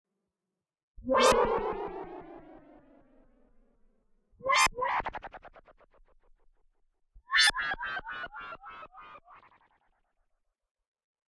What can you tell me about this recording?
funny laugh like
A laugh-like sound made on an analogue modelling synth.